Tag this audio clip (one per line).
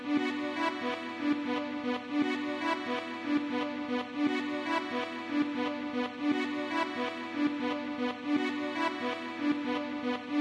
DELICE
Walk-D
WALKING